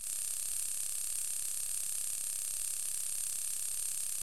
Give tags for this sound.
appearance; Bourne; electronic; forming; intro; location; movie; sound; telex; text; trilogy

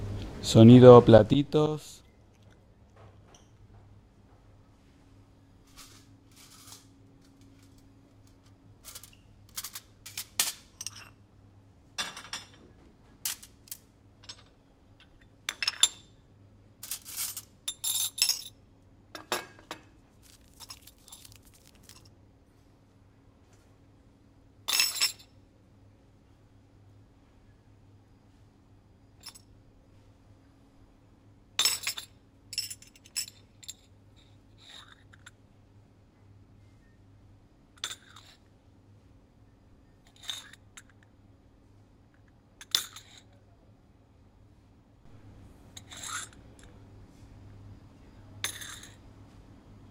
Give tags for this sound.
bar; Coffee; dishes

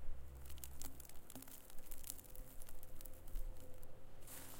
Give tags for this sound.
nature; crackle; natural

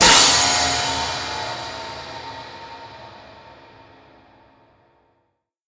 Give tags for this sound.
bang; crash; release

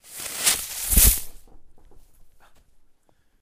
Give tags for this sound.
bee; buzzing; firework; human; noise; spin; stereo; wind

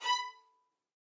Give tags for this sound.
b5; midi-note-83; midi-velocity-31; multisample; single-note; spiccato; strings; violin; violin-section; vsco-2

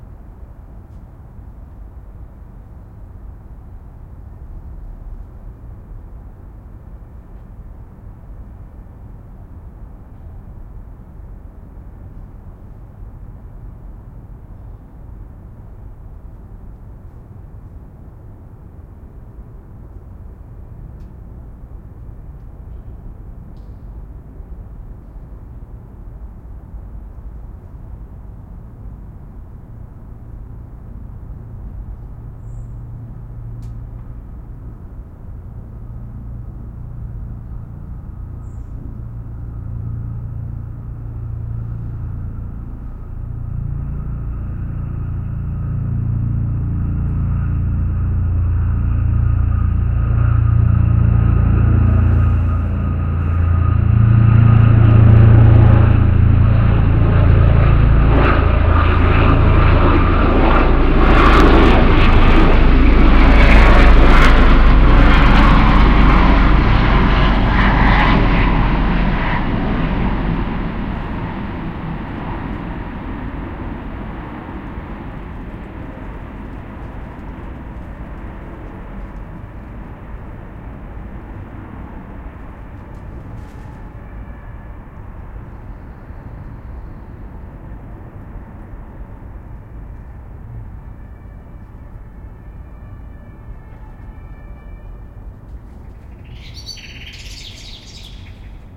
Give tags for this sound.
field-recording; helicopter; plane

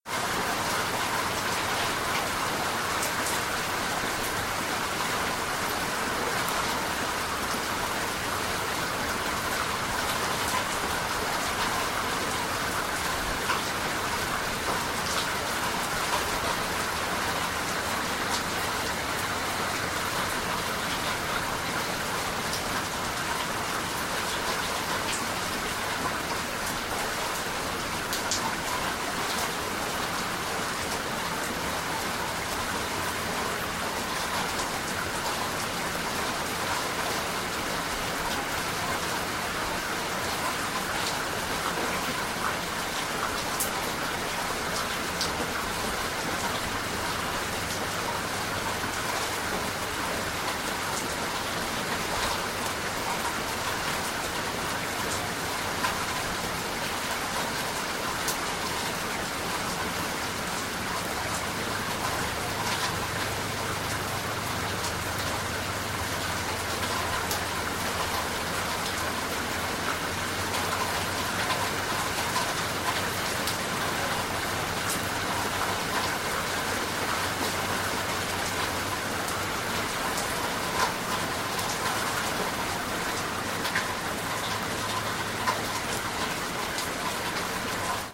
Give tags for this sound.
Ambience; Ambiance; Storm; Shower; Downpour

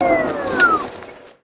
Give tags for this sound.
electric-engine; engine; motor; train; vacuum-cleaner

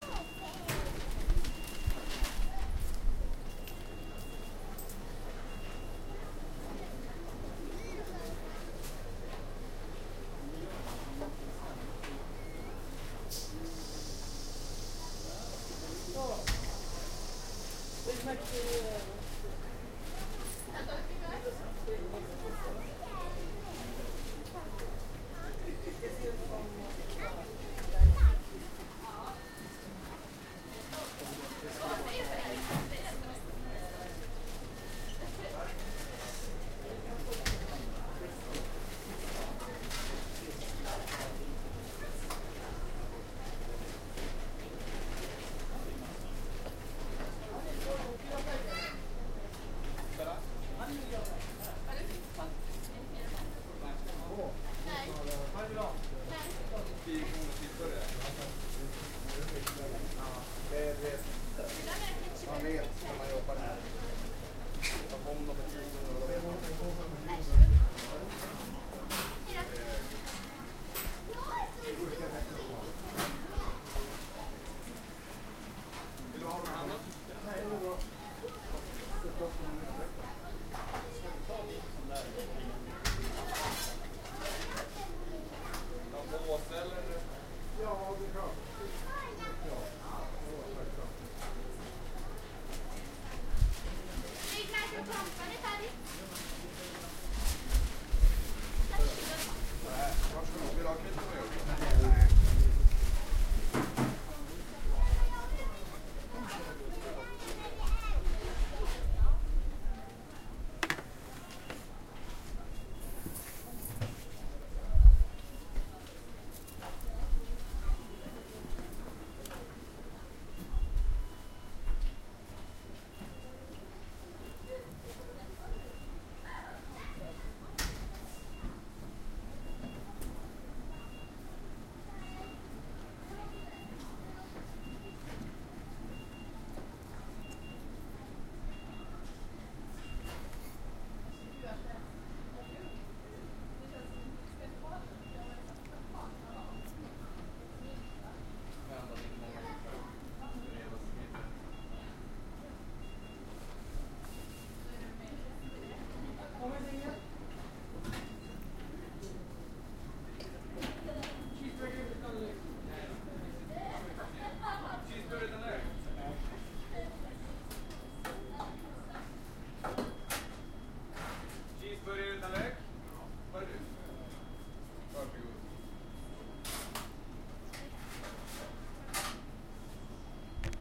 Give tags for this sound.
field-recording; food; restaurants

